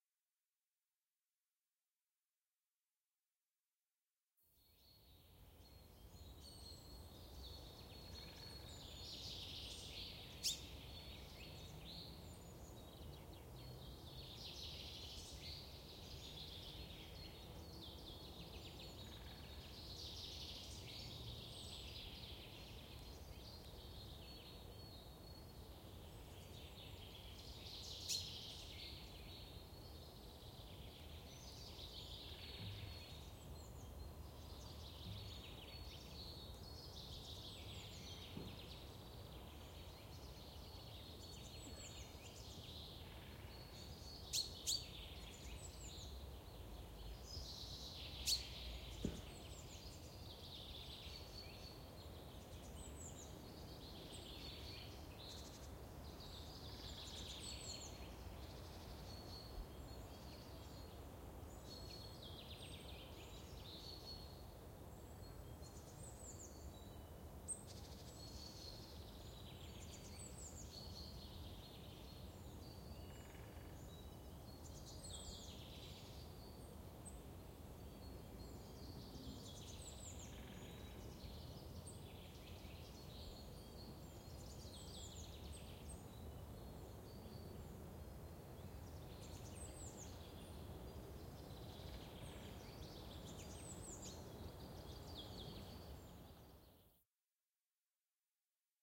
Dutch forrest ambience, recorded in April 2010 at De Lage Vuursche. X/Y stereo field recording with Zoom H4n.
birds, ambient, field-recording, forrest, atmosphere, general-noise, soundscape, Dutch, ambience, ambiance, atmospheric, background-sound, city